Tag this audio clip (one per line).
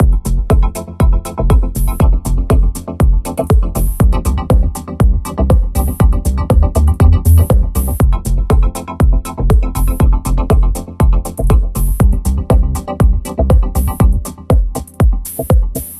beat
dance
loop
deep
drop
happy
house
electro